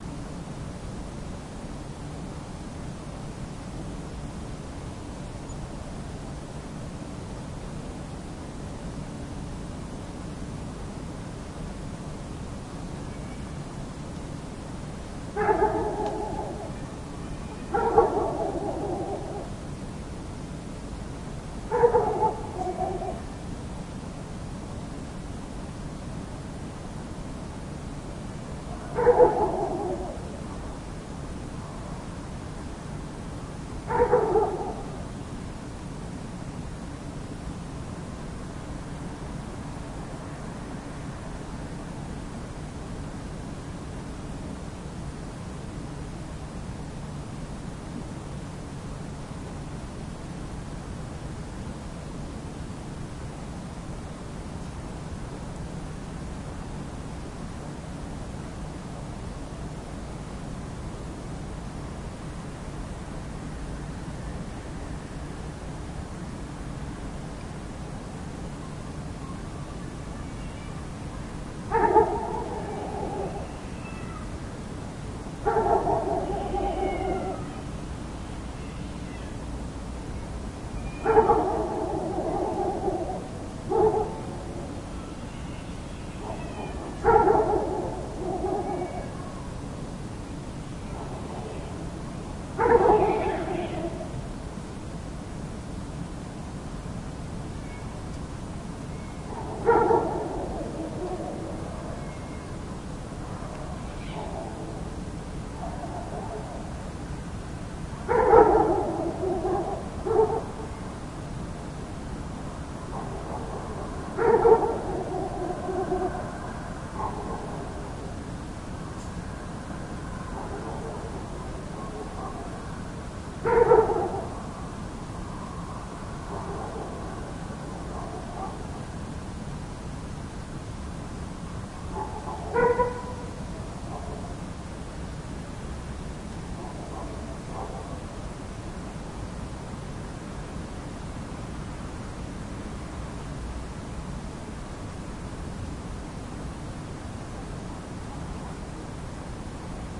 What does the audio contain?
dog barking at night
Night scene with dog and cat.